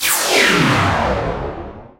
Long (duration) laser shot